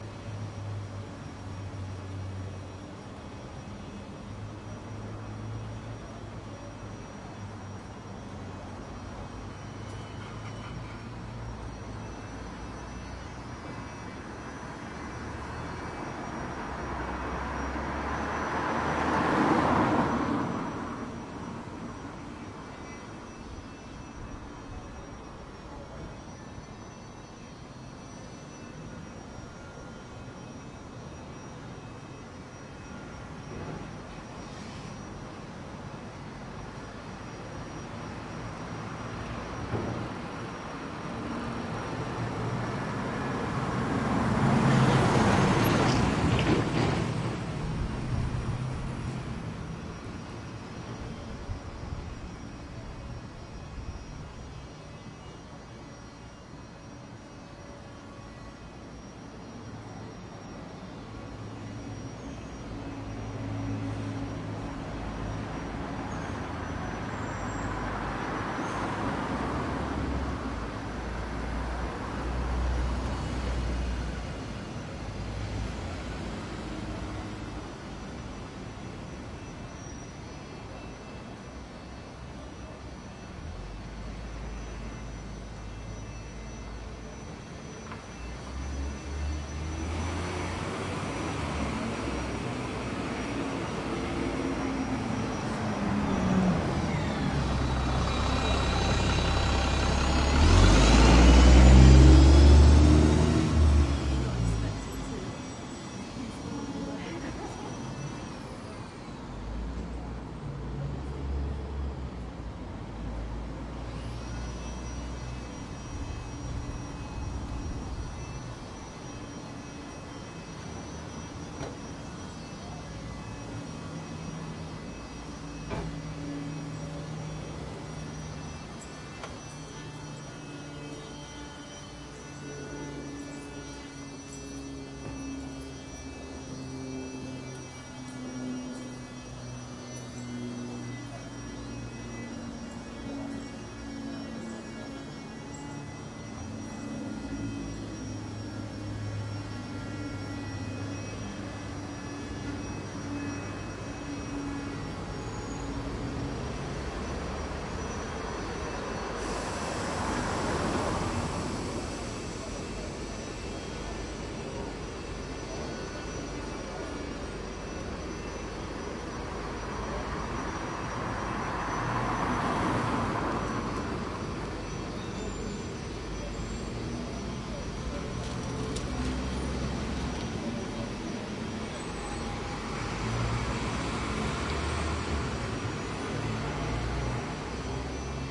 03 Winchester street 2
A trip to the lovely english town of Winchester, on a lovely autumn morning...
Busy, Cars, Field-Recording, Leisure, People, Street, Town, Winchester